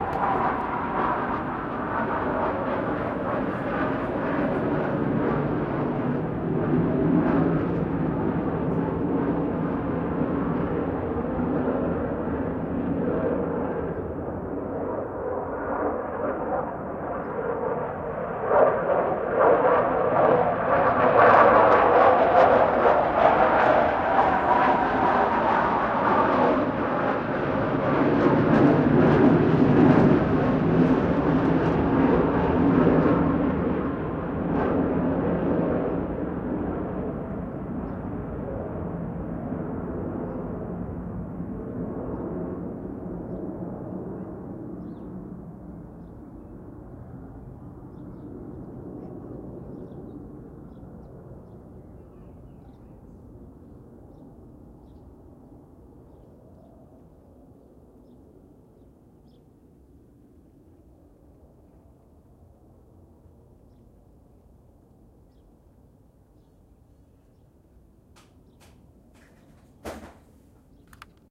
2 RNLAF F-16 Fighting Falcons flyby (noisy/with birds)
2 Royal Netherlands Air Force (RNLAF) F-16 Fighting Falcon fighter jets taking off from Leeuwarden Airforce base. I have another, more clean, recording of 2 fighter jets taking off in this same pack.
Recorded with a Tascam DR-05 Linear PCM recorder.